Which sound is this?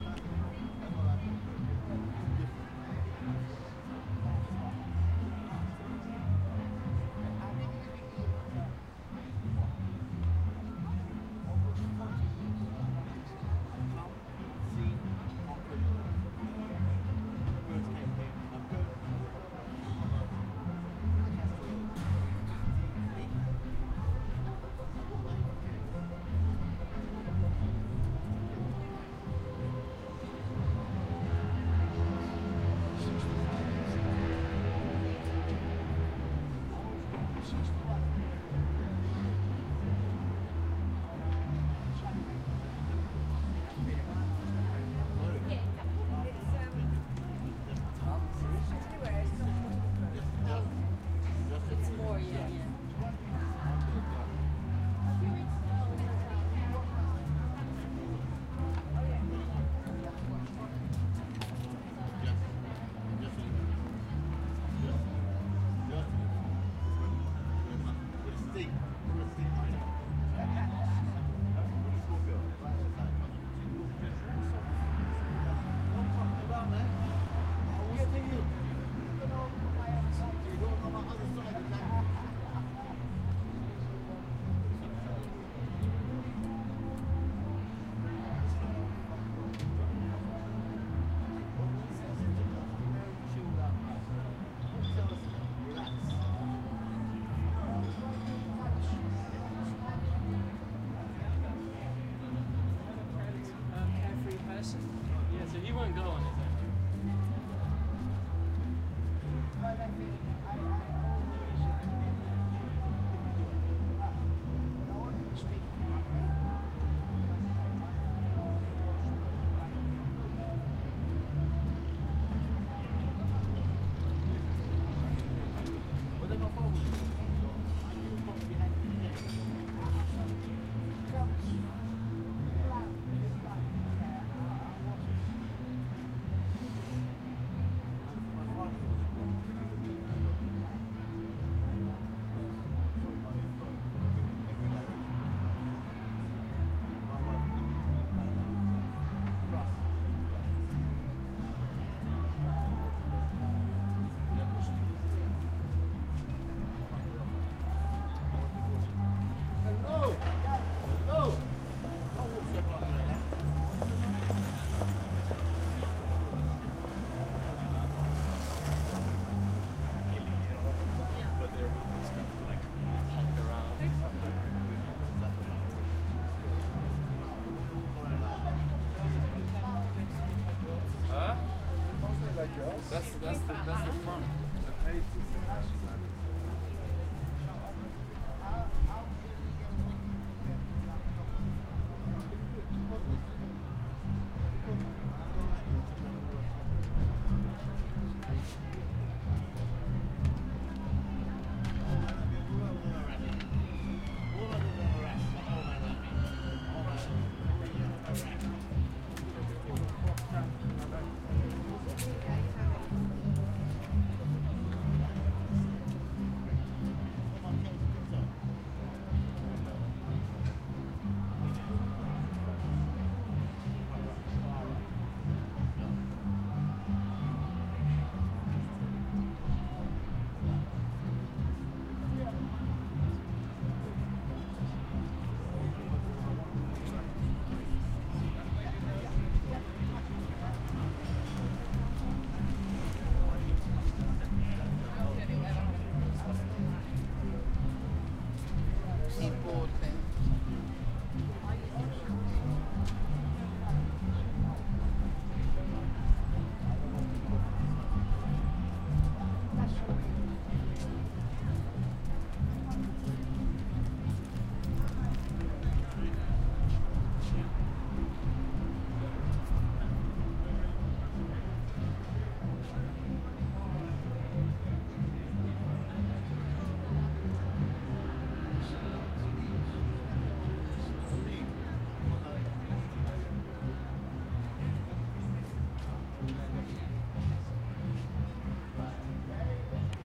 This is a recording I got sat outside a retro clothes shop on Portobello Road in London. Distant music combined with the market and people walking past creates a busy scene with the busy road that runs over the top of the market giving a nice base to fill out the recording.
Urban, Talking, Portobello-Road, Traffic, Multicultural, Market, Busy, town, London, Field-recording, Street, Portobello-Market, Portobello, Free, shops, City